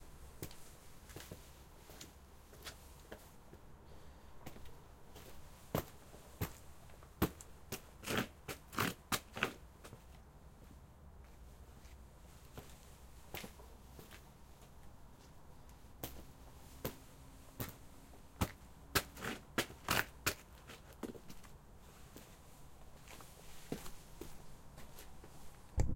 Shoes cleaning
walking, cleaning